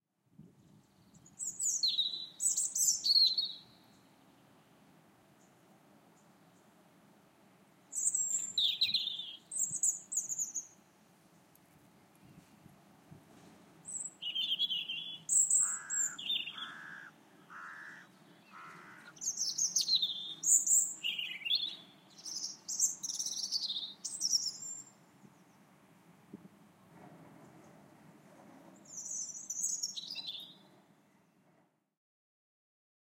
4amBirds CityCentre
Some birds (wrens and crow?) recorded at 4am in Newcastle City Centre.
4am, birdsong, field-recording-uk, nature, spring